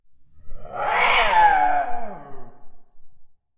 Original sound edited with Audacity 2.3.1:
3) Effect > Normalize
3) Effect > Reverb (default params: Room Size 75 %, Pre-delay 10 ms, Reverberance 50 %, Damping 50 %, Tone Low 100 %, Tone High 100 %, Wet Gain -1 dB, Dry Gain -1 dB, Stereo Width 100 %)